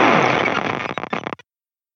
Another example the end section of a tail.
See pack description for details of how these sounds were created.
amplifier, amp-modelling, amp-VST, arifact, experimental, glitch, noise, sound-design, virtual-amp